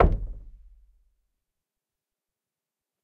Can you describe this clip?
Door Knock - 14
Knocking, tapping, and hitting closed wooden door. Recorded on Zoom ZH1, denoised with iZotope RX.